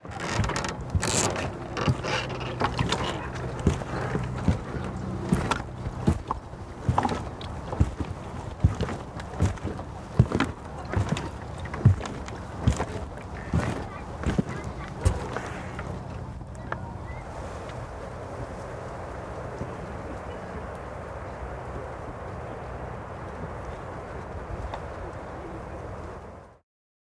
Footsteps Walking Boot Pontoon to Standstill Faint Aircraft
A selection of short walking boot sounds. Recorded with a Sennheiser MKH416 Shotgun microphone.
water, sfx, boots, pontoon, outdoors, walking, footsteps, foley, walkingboots, atmos, walk